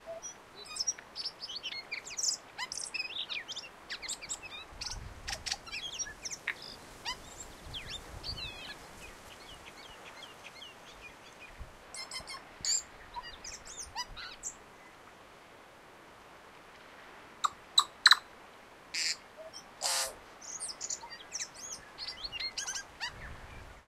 A New Zealand Tui bird singing. The bird sits in a bush close-by and produces various colourful noises. It's windy, in the background the waves of the ocean.
Post-processing: a soft low-shelf to weaken the noise of the wind.